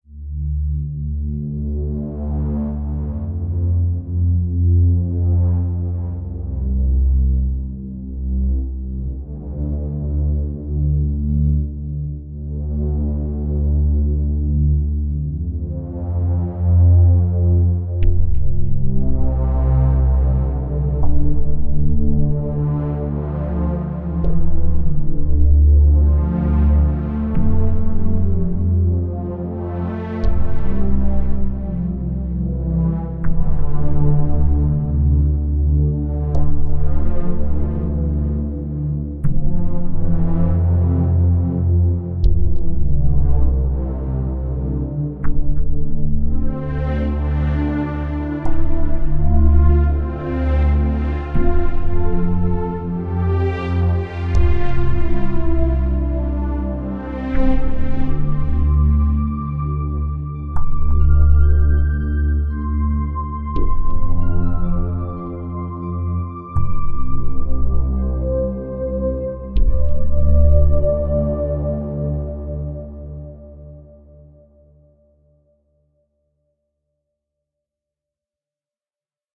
epic ambient track
simple track made with Fl Studio 20
It's an ambient track in a style that will be called "proto-dungeon synth"
ambient, barbarian, cry, dungeon, warrior